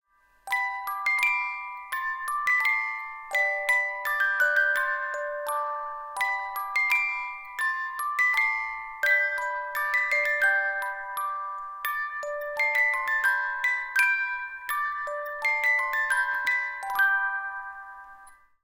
Music Box Clockwork - Lullaby
I've recorded a little clockwork music box.
I hope someone would enjoy this as much as I do... :D
Hardware: Zoom H1.